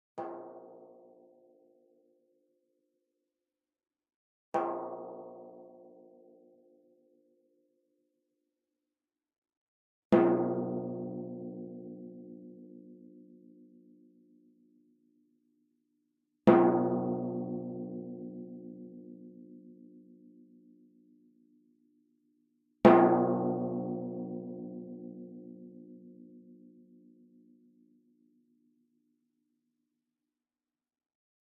timpano, 64 cm diameter, tuned approximately to B.
played with a yarn mallet, on the very edge of the drum head.